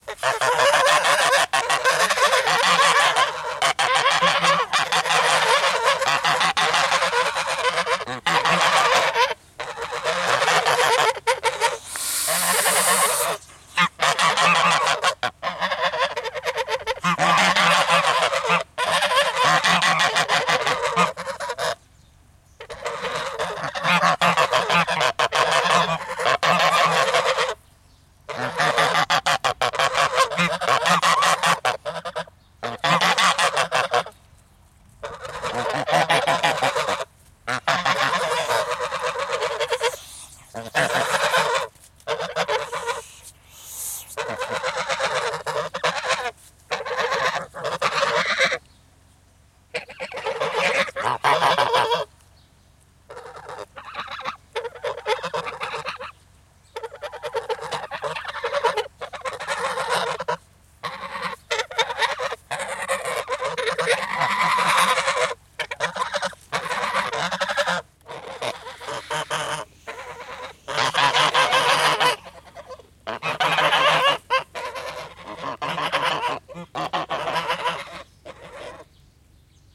Geese multiple angry shouting hissing close
6 geese shouting angry as if defending, hiss noise, rural area, no human activity around , no traffic/machines.
Germany, North, Summer 2017.
angry, Animal, Geese, Bird